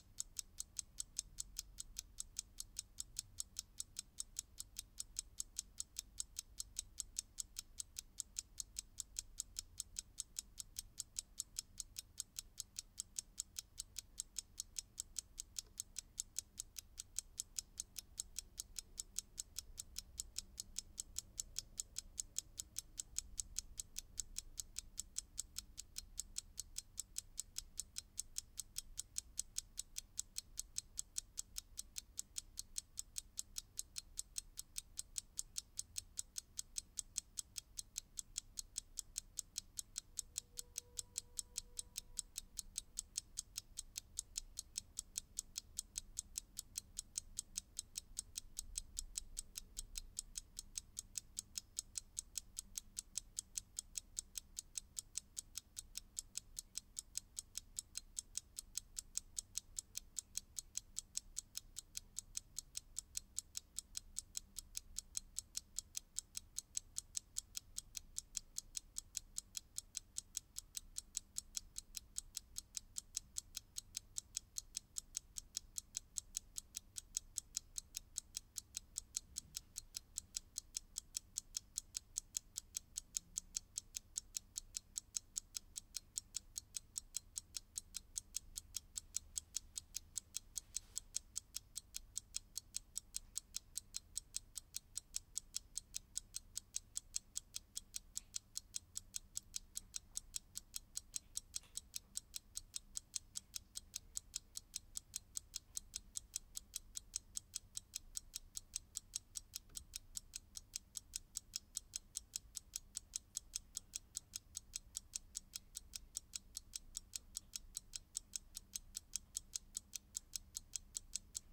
Mechanical alarm clock is ticking (SLAVA)
Old Mechanical alarm clock is ticking
alarm, clock, Mechanical, russian, ticking